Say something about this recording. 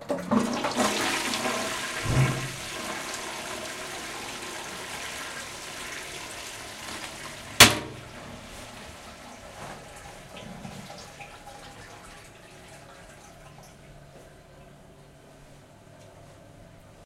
Plagazul
flushing the toilet after he's done peeing and banging the toilet lid.
Sadly enough the recording of him peeing was of bad quality.